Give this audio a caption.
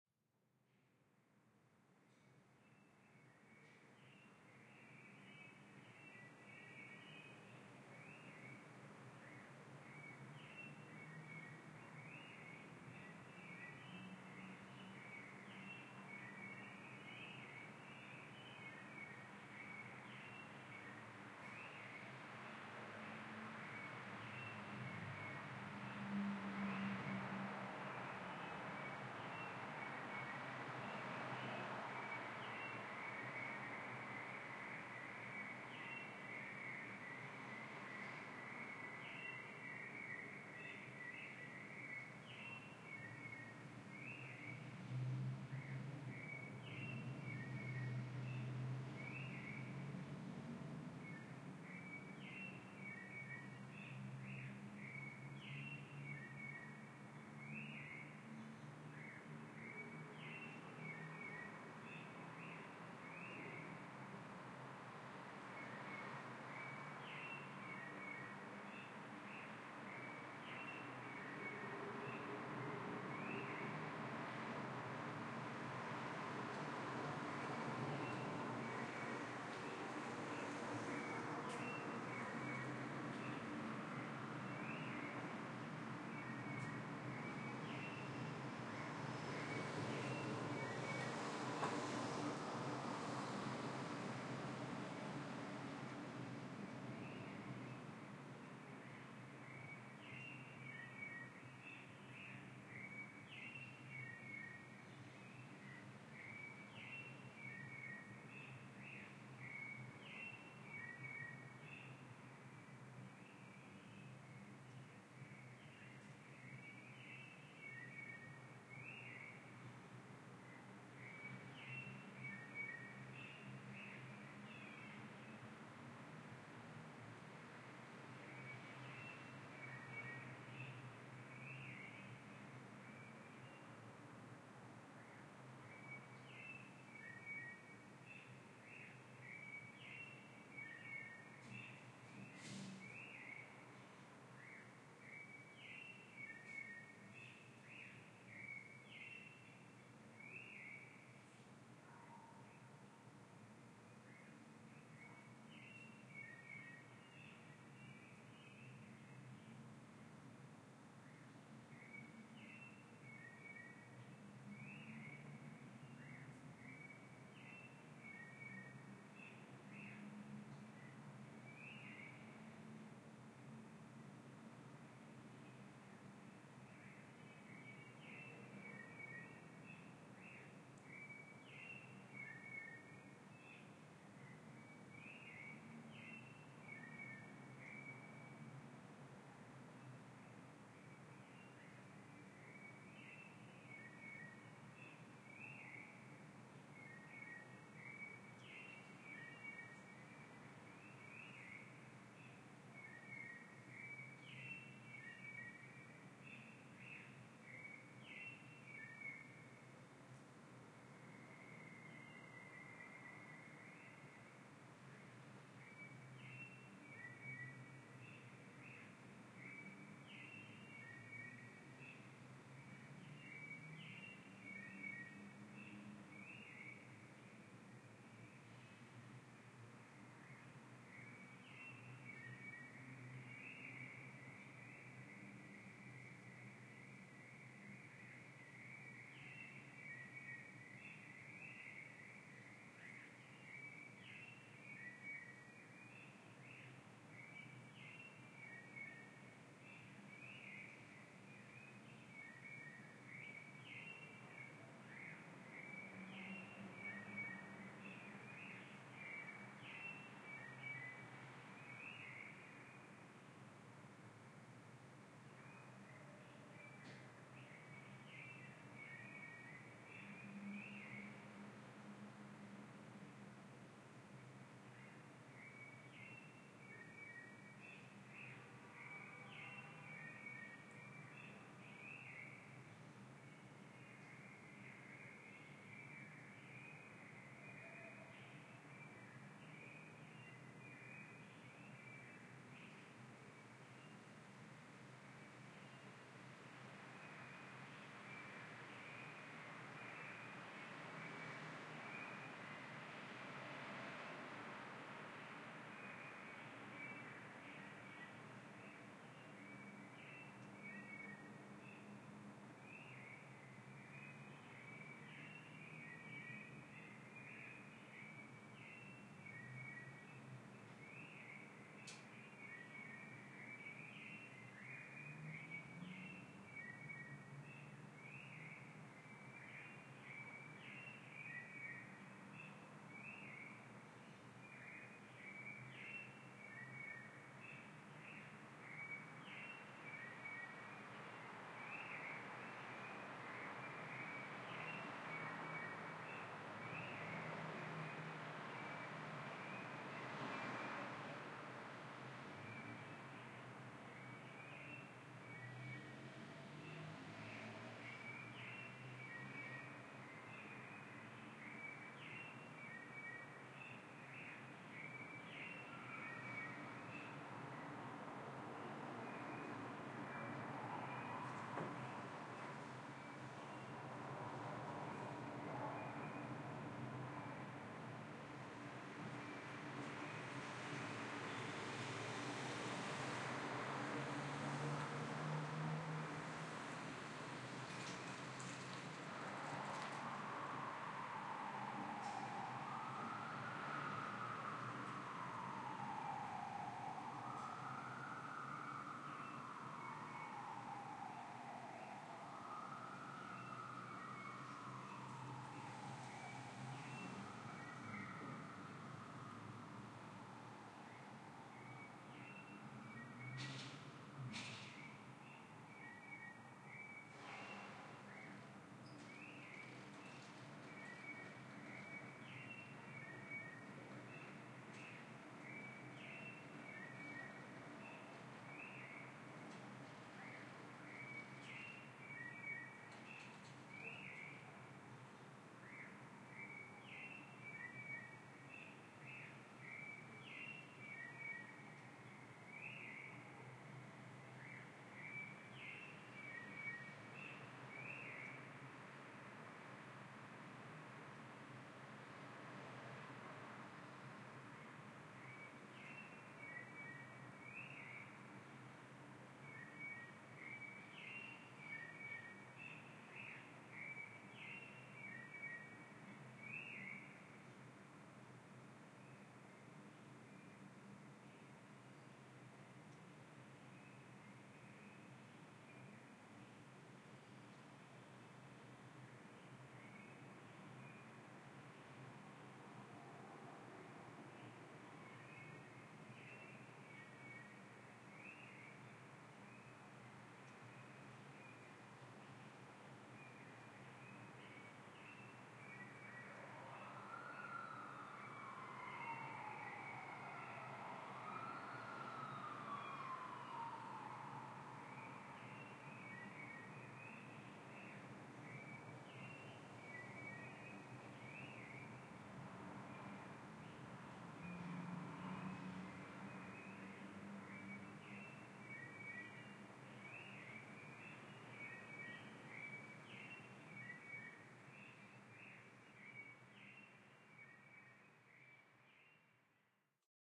Room tone medium sized apartment room with open window
Room tone captured from a medium sized apartment room with open window. Traffic and birds can be heard. Hour: 7AM.
Recorded with Tascam HD-P2 and pair of AKG C3000 condenser mics in A-B way.